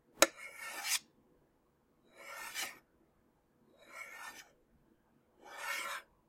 Smooth Metal Sliding
Sliding a spoon against the metal casing of a PC optical drive. Smooth sounding metallic slide. Processed in Audacity to remove background noise. Recorded on Zoom H2.
smooth, metal, metallic, field-recording, mechanical, slide, scrape